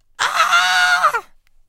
A High-pitch male scream.
Recorded with AT2020